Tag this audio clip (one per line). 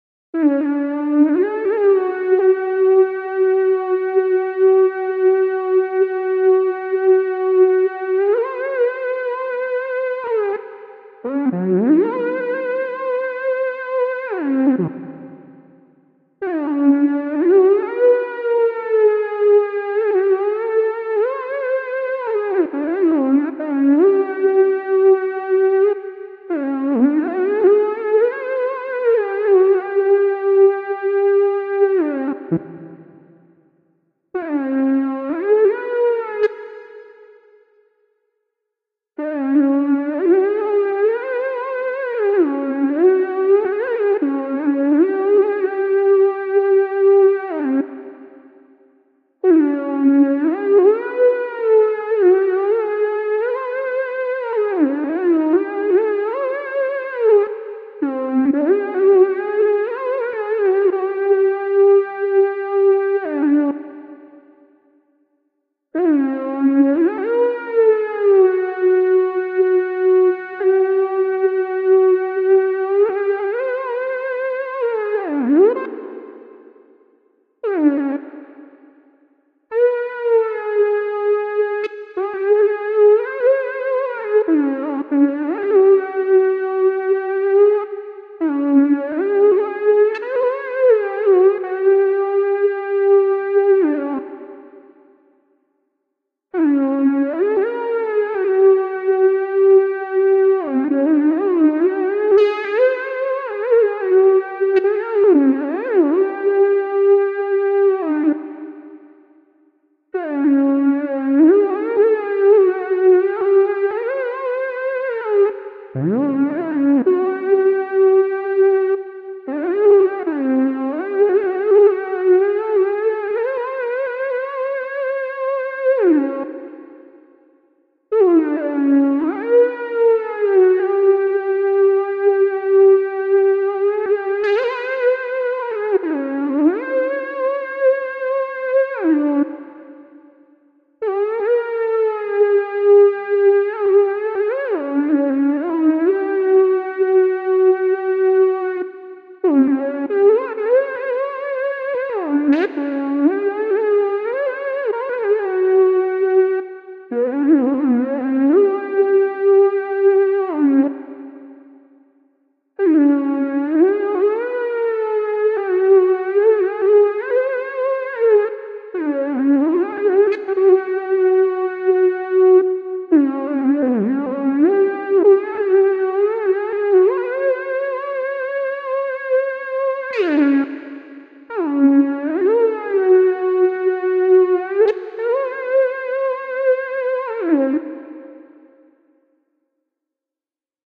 ethnic
synthesizer
viet-nam
vocal